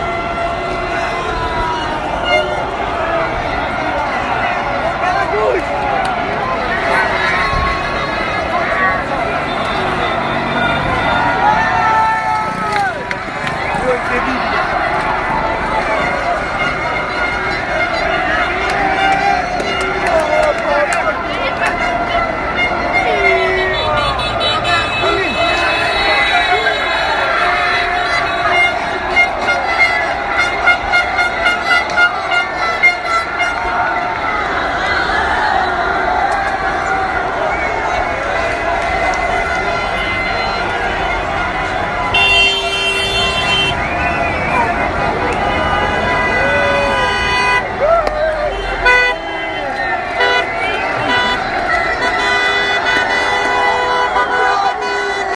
Crowd celebrates 1
A recording I made shortly after Italy beat England in the Euro-Finals 2021. People shouting and screaming, general commotion.
car, crowd, demonstration, shouting, soccer